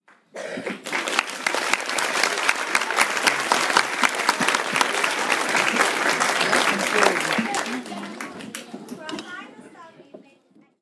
Large crowd applause sounds recorded with a 5th-gen iPod touch. Edited in Audacity.